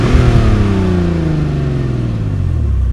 Porsche slowing down
down, Porsche